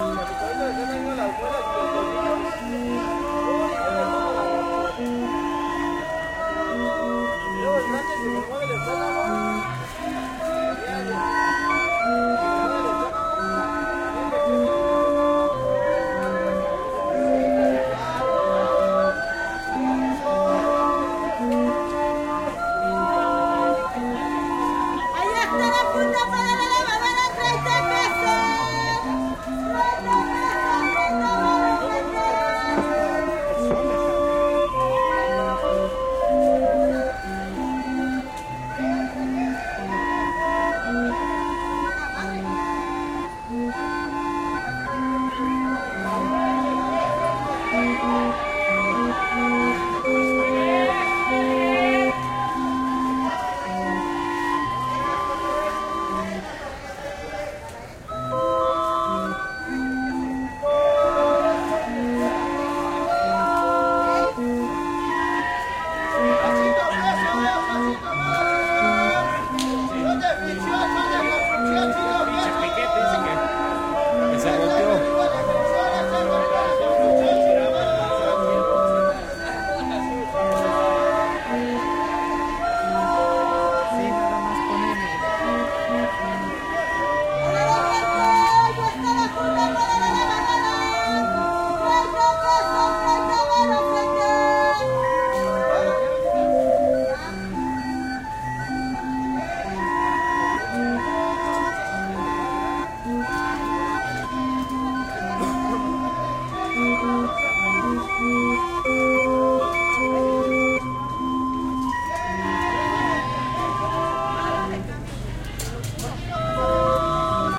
scary clown music
effects, sounds, tone